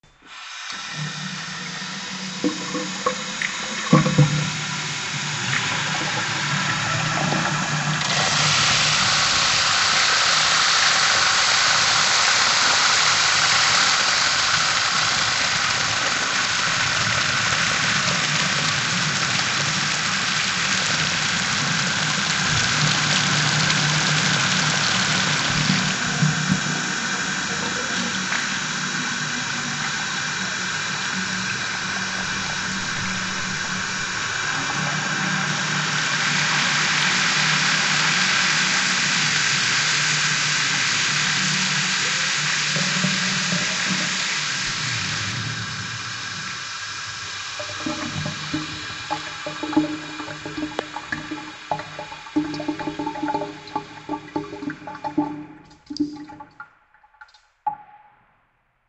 No secrets here. I took a trip to the bathroom and let some water flowing while recording the sound with my mp3 player. Then I processed the sound in the PC, mixing some parts and layers and finally adding some reverb.